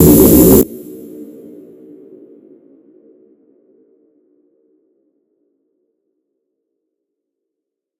percursive, layered, cinematic, processed, granular, pvoc, time-streching, ableton live, maxmsp